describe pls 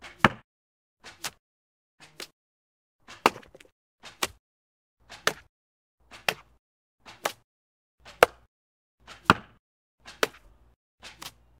Melon Impact 2
Shooting a melon with a longbow.